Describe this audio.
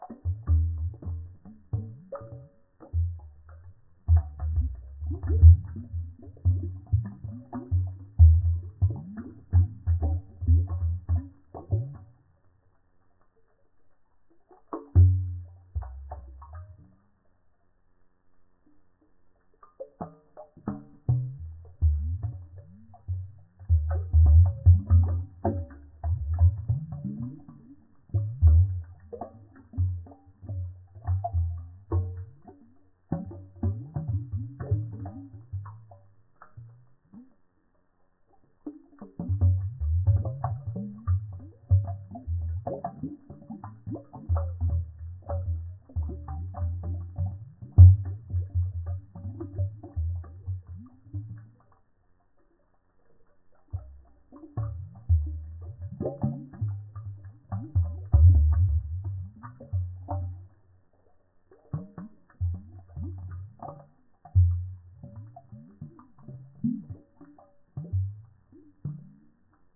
Sinking Submarine
Sounds of deep water bubbling. I imagined it as the noise of inside a sinking ship or submarine.
Sound created by slowing down a recording of pouring water.
boat, bubbles, deep, ocean, or, sea, ship, sinking, Sounds, submarine, under-water